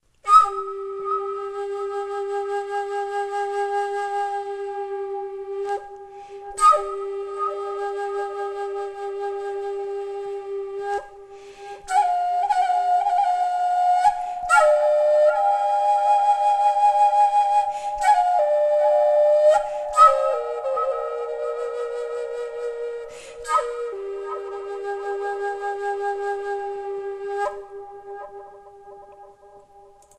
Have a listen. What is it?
overblow echo
This style of flute playing is probably what you think of when you think of the Native American Flute. This sound file is enhanced with "canyon" style echo. Overblowing sounds fantastic when enhanced with an echo. When you overblow, the sound jumps up one octive higher. This flute was crafted in the key of G and is made from western red cedar which produces a very mellow sound.